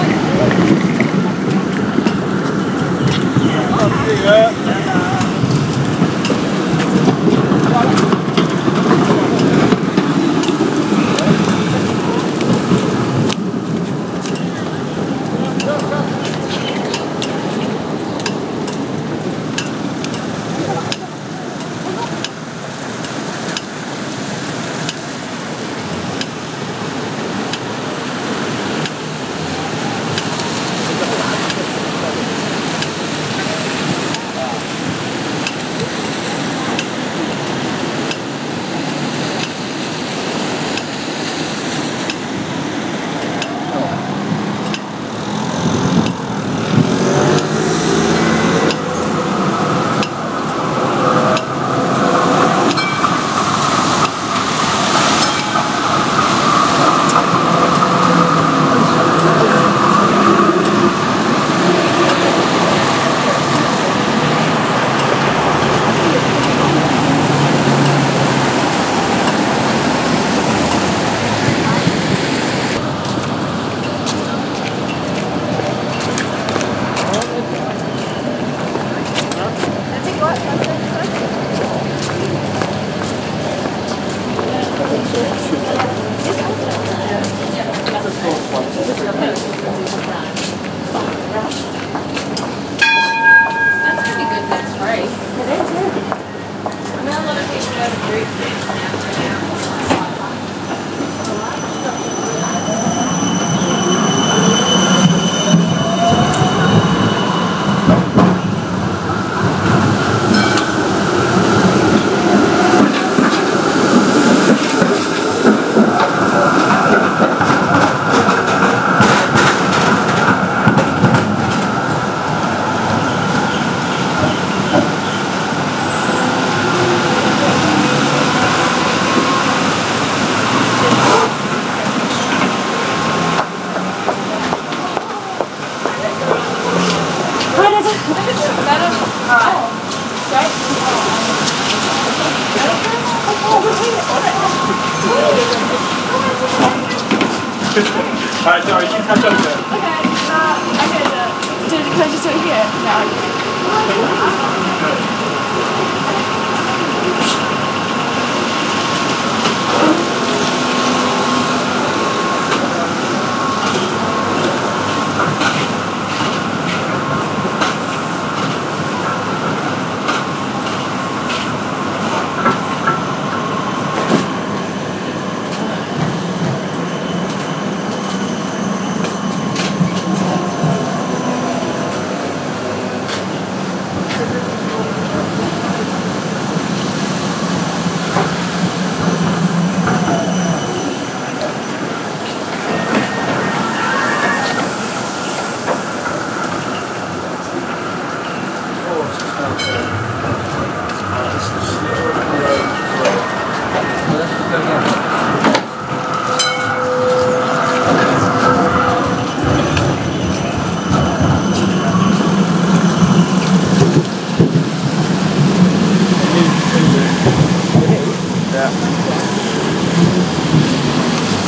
flinders st trams
trams outside Flinders St Station Melbourne Australia
city, field-recording, traffic, Melbourne, trams, people